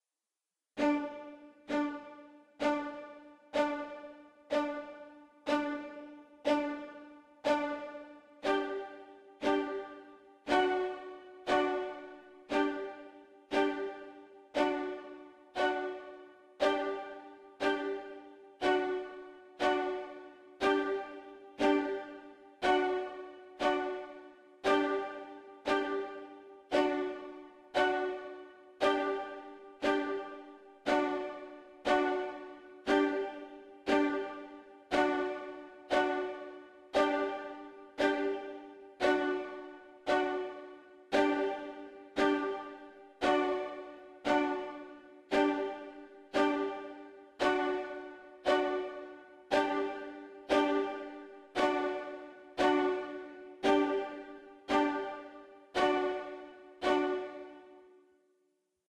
Some clips created for transition in a play. Originally for Peter Pan but maybe used for other plays.